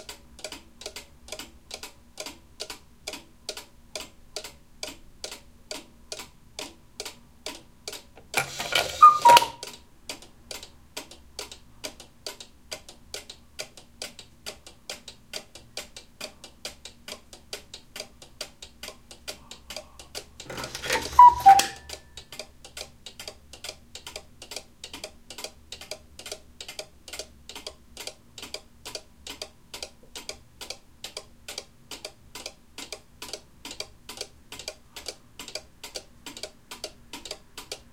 The sound of two antique cuckoo clocks taken on Tascam DR-05 by me.
grandfather-clock, tick, time, clock, cuckoo, ticking, tick-tock